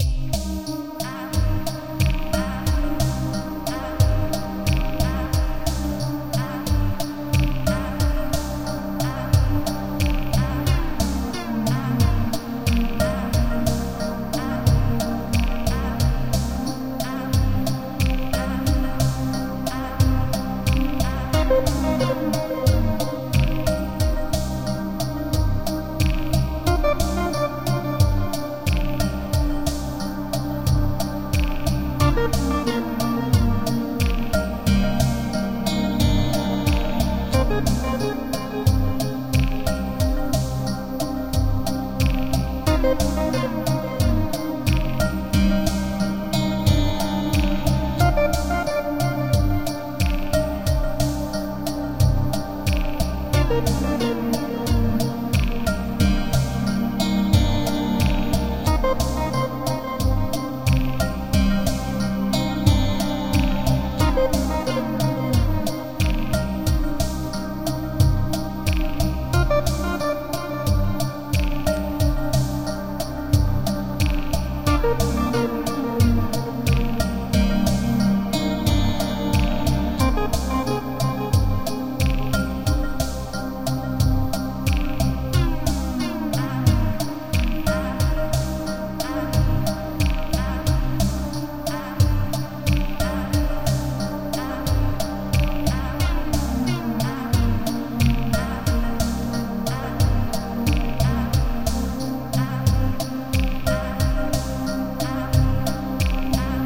Ambient electronic music 001
Ambient electronic music.
Synths:Ableton live,silenth1,synth1
dark, soundscape, synth, music, noise, ambience, atmosphere, cinematic